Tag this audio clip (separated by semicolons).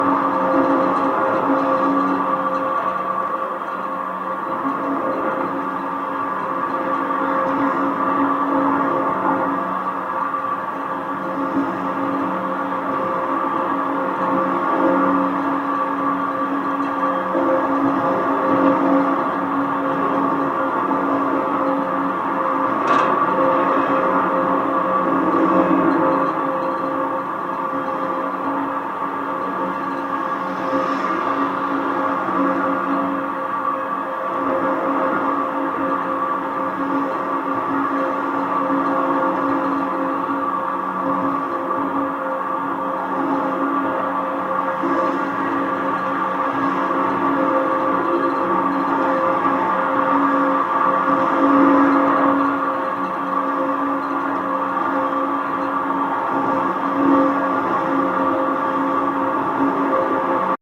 mic; metal; post; steel; Schertler; San-Francisco; Golden-Gate-Bridge; wikiGong; Marin-County; lamppost; DYN-E-SET; contact; field-recording; bridge; DR-100-Mk3; contact-microphone; Tascam; contact-mic; lamp